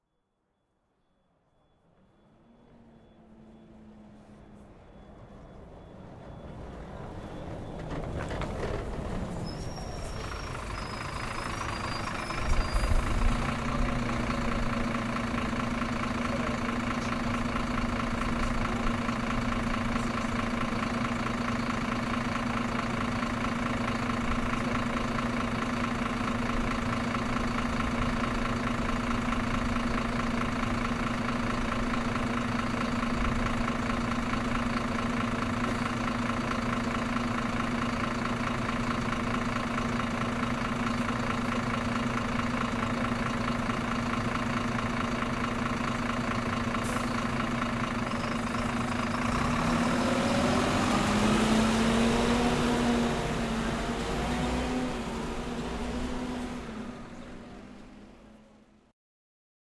Arrival and stop of the bus